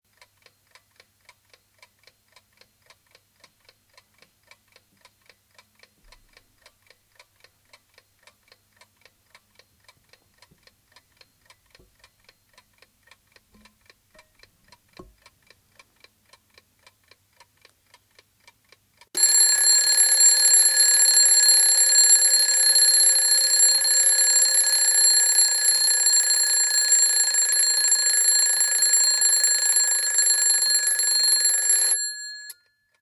My old mechanical alarm clock ticking away the time until the alarm goes off, a the end you can hear it slowing down a bit, as the tension unwinds